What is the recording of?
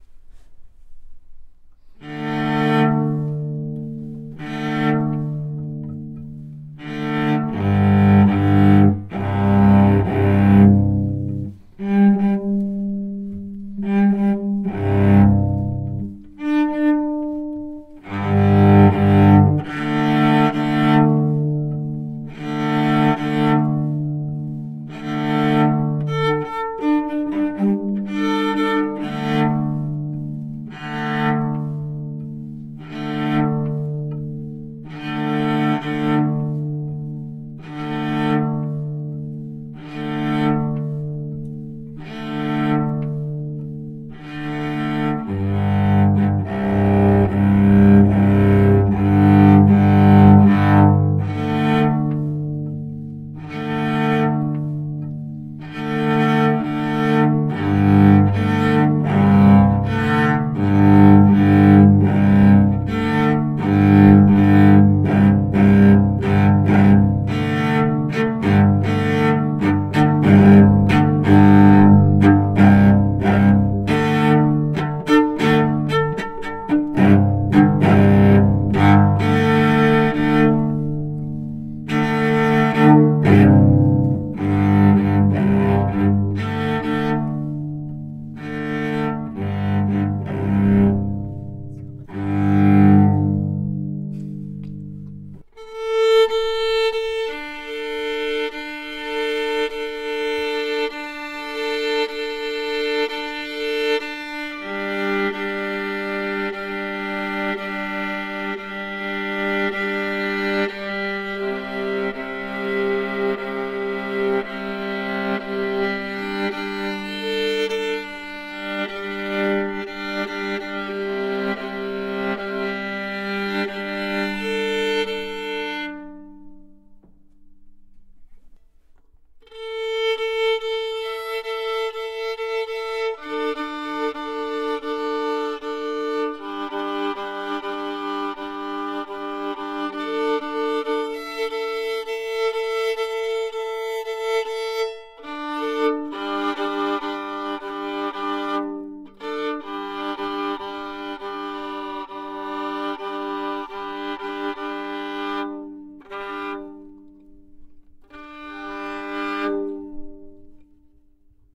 Tuning String Instruments Cello, Viola, Violin
Short Tuning of Strings.
Recorded in mono with Oktava 012 microphone and Sound Devices recorder.